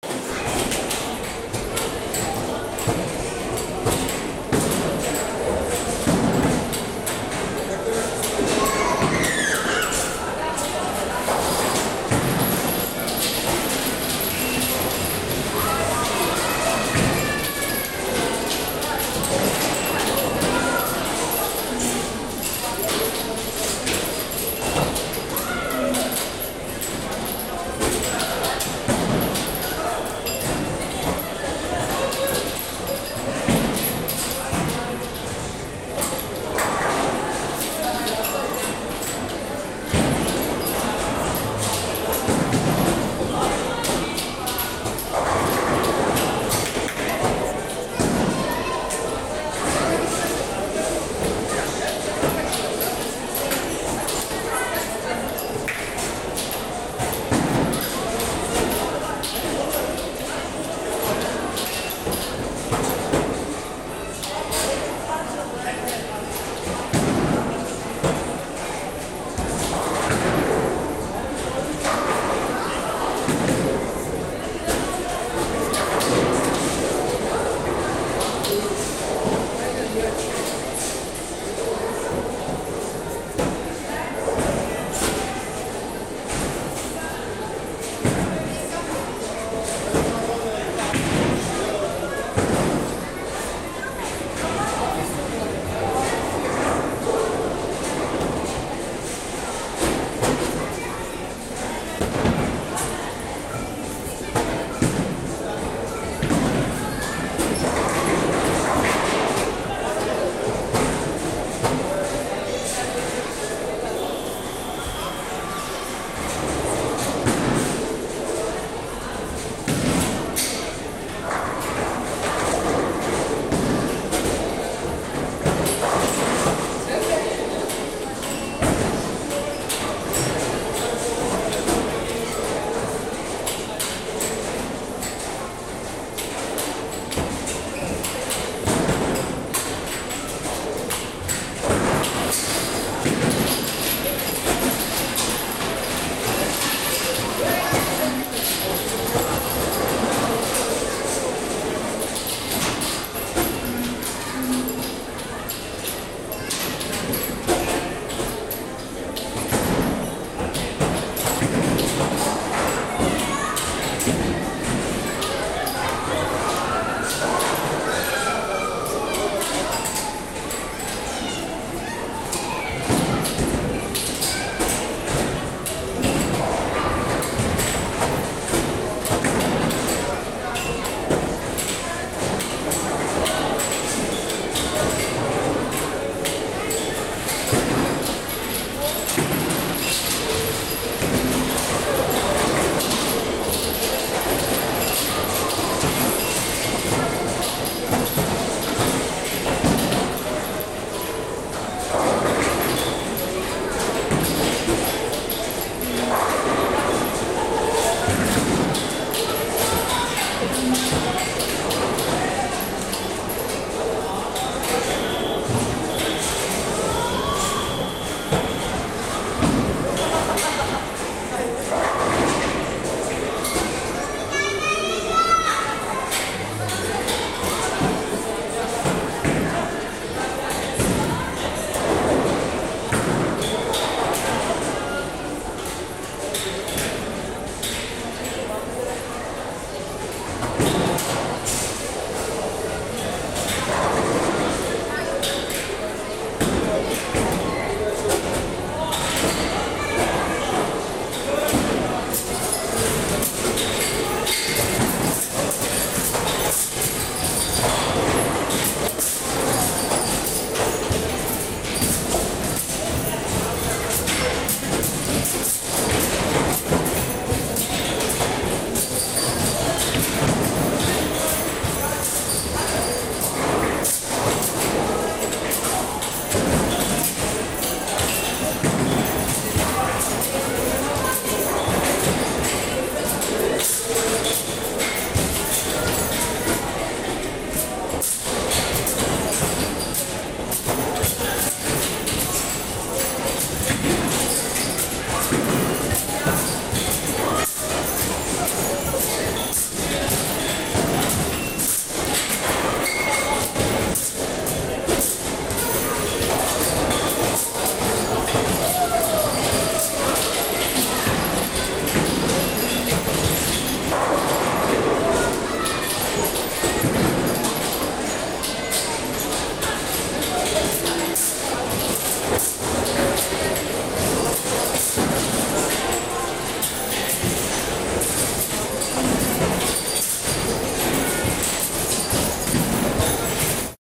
Play Center/Joypark Field Recording at Shopping Mall
The sound of the Play Center inside a shopping mall in Istanbul, Turkey.
You can hear sounds like bowling, people, air hockey.
I recorded this sound with my mobile phone.
You can use this sound any way you want.
air-hockey, airhockey, ambience, ambient, basketball, bowling, children, field, field-recording, gamecenter, joypark, kids, mall, people, play, playcenter, recording